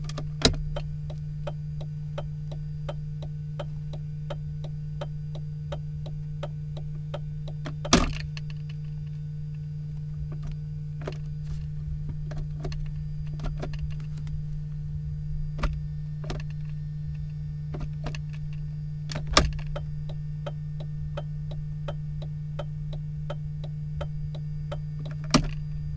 Turning on and off the turn signal.
cockpit, car, turn, signal